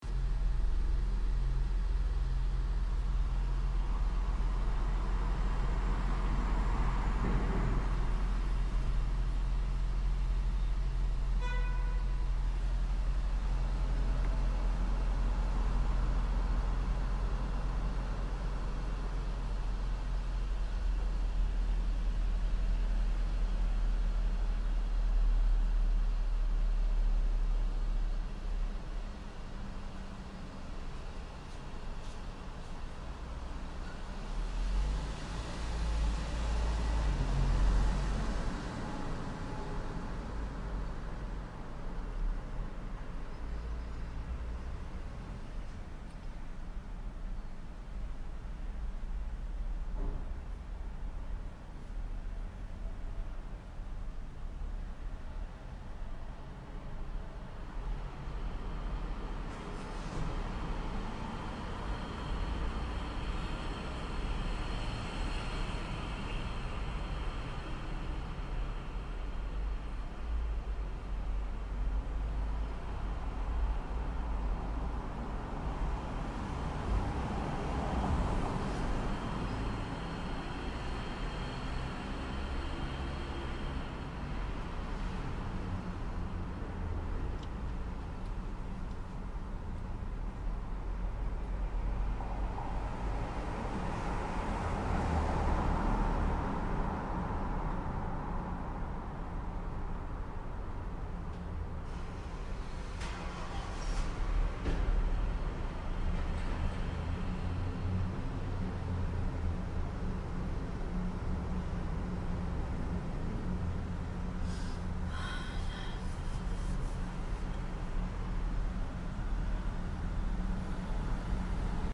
Waiting in Parking Garage
I'm waiting in the parking garage. A car beeps, and another passes by.
ambience, cement, parking-lot